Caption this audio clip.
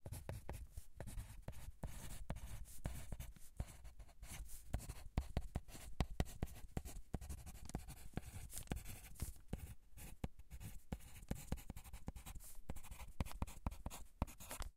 writing - pencil - wide 01
Writing with a pencil across the stereo field, from right to left.
Recorded with a Tascam DR-40, in the A-B microphone position.
graphite, paper, pencil, right-to-left, rustle, scratch, scratching, scribble, wide, writing, written